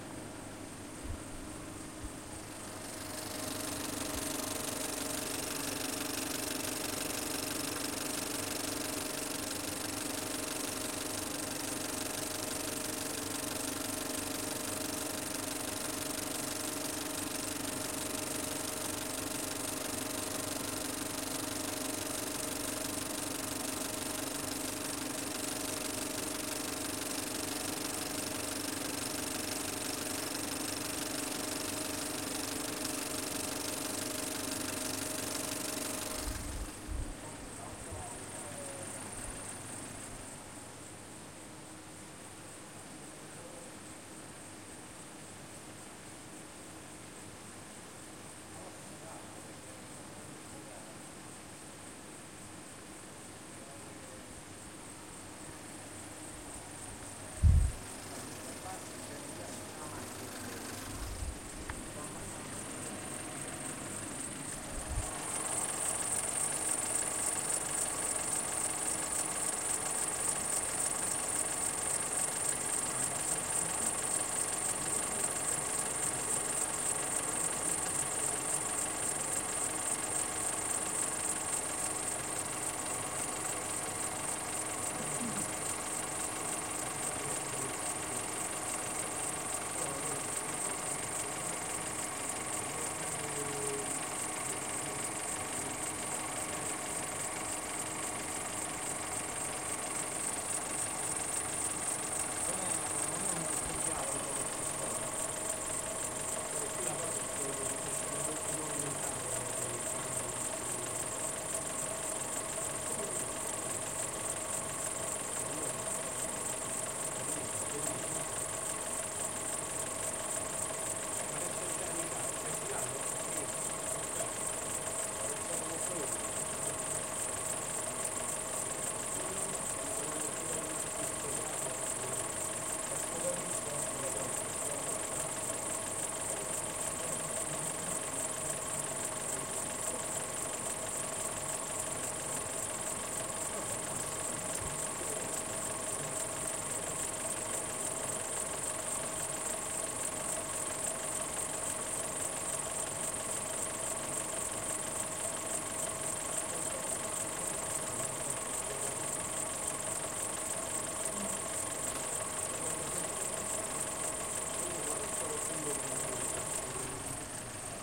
film projector 04
cinecitt, projector, film